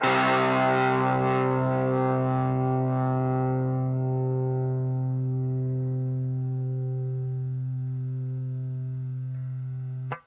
Two octaves of guitar power chords from an Orange MicroCrush miniature guitar amp. There are two takes for each octave's chord.
distortion, guitar, chords, miniamp